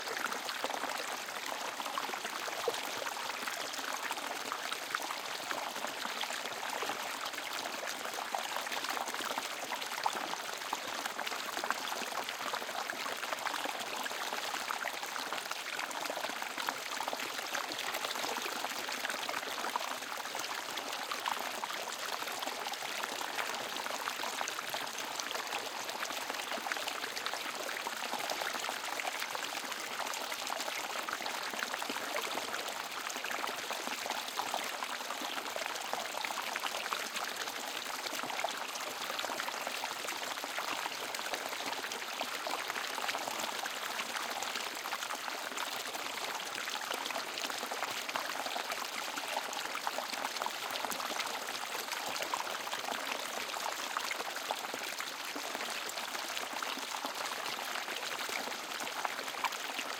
Water stream 5
One in a series of smaller water falls from a stream in the woods. Water is pouring down over some rocks.